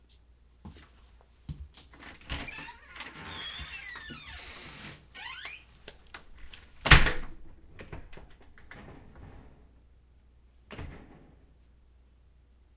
A great recording of someone leaving a house. You can clearly hear the storm door as well as the main door.